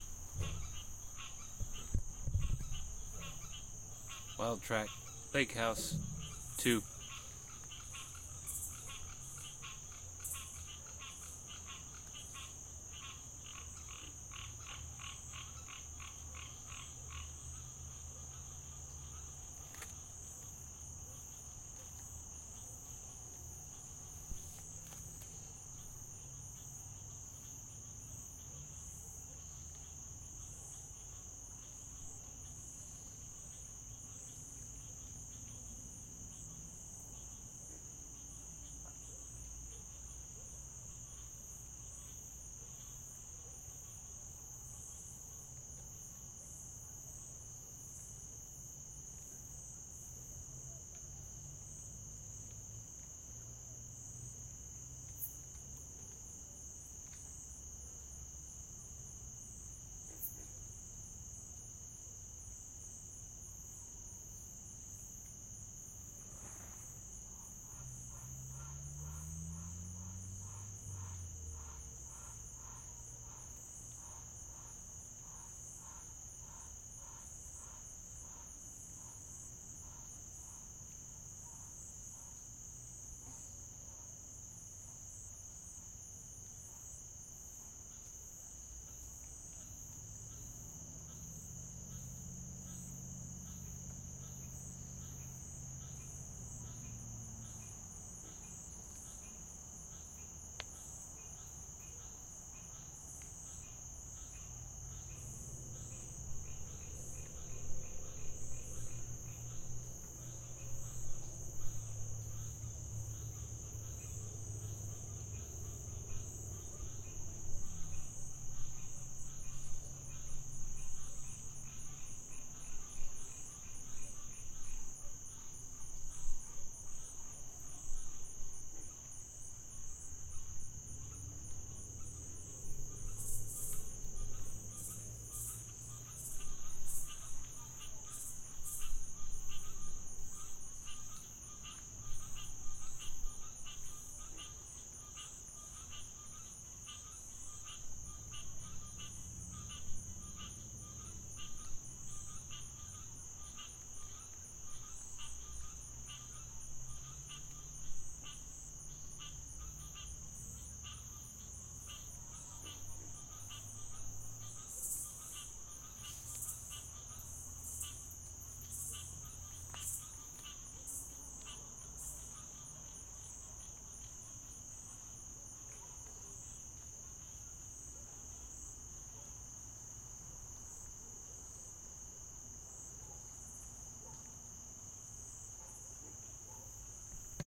Lake at night 2
Swampy lake sounds at night, with crickets and frogs.
I've used this site a lot, wanted to give something back!
frogs, nature, lake